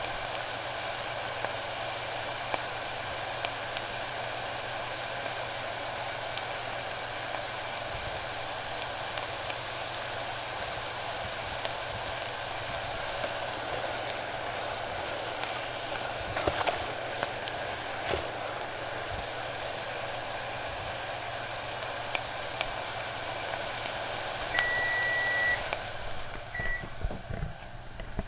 Sauteing Shrimp
I sautéed shrimp